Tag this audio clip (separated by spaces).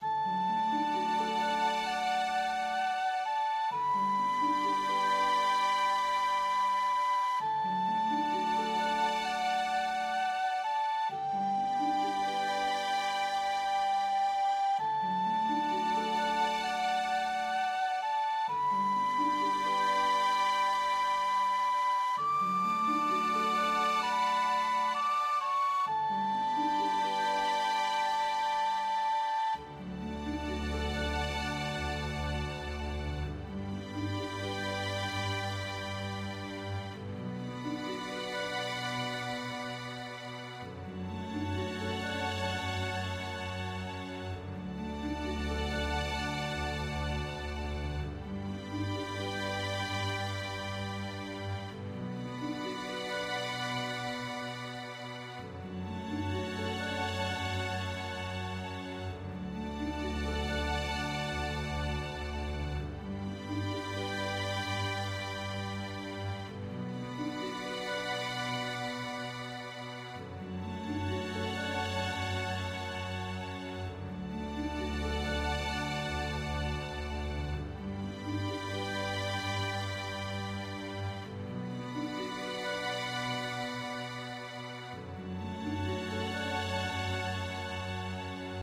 background calming music Orchestra